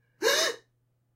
female gasp sound effect